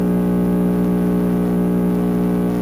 Electric Sound Effect
noise, electric, noisy